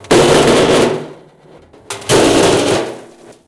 sound of a Metal trashcan lid. Microphone used was a zoom H4n portable recorder in stereo.